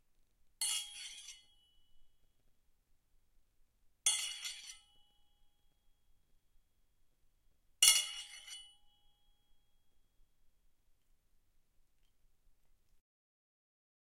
METLImpt Sai Weapon Foley Scrape, Ring
I recorded my Sai to get a variety of metal impacts, tones, rings, clangs and scrapes.
impact, metal